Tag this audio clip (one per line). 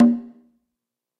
bongo
cuban
percussion